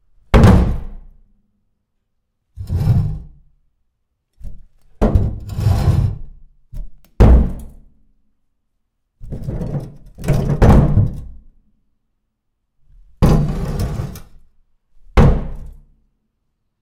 wheelbarrow put down on concrete floor metal hits impacts thuds and slide
recorded with Sony PCM-D50, Tascam DAP1 DAT with AT835 stereo mic, or Zoom H2